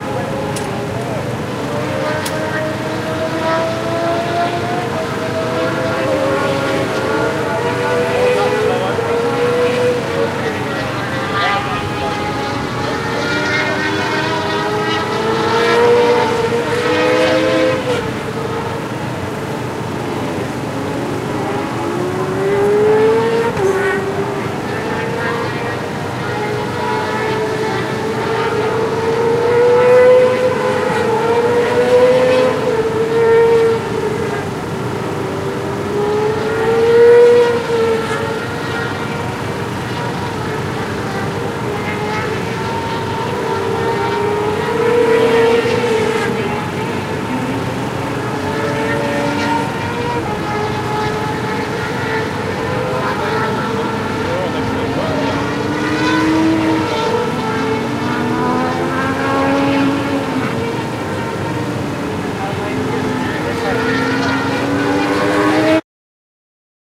Recording made from the paddock area of Anglesey Race Circuit, North Wales. Made using a Zoom H4N.